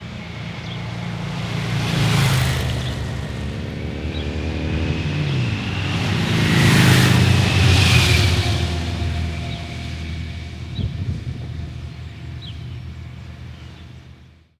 Some multiple passing motorcycles. Recorded with a Behringer ECM8000 omni mic.